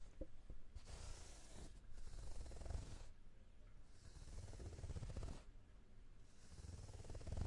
Stroking Corduroy Chair
This is of a person gentle stroking a corduroy textured chair.
Original, Stroke, Unusual, Texture, Corduroy, Abstract, OWI, Tactile, Gentle, Chair, Strange, Weird, Pattern